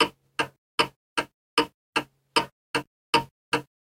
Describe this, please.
Normalized and cleaned up version of the table clock recorded by dobroide.